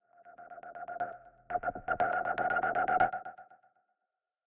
Whoosh StutterMuted ER SFX 14
swish, swoosh, chopped, whoosh, stutter, woosh, air, choppy, chop, swosh, long, transition, soft